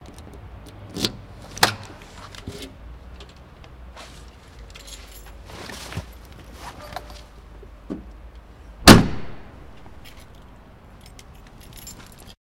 open and close car trunk